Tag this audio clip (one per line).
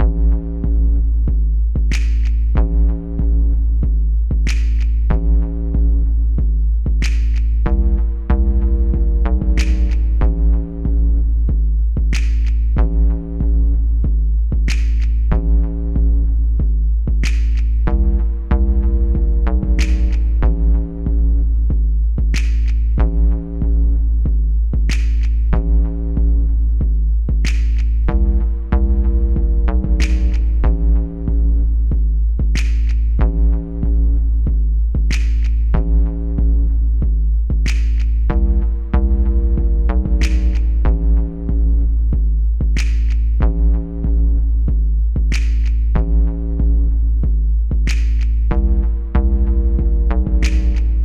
drums
original
Loud
dance
hiphop
beat
rap
music
hip
claps
track
trip
loop
synth
beats
hop
frankunjay
Bass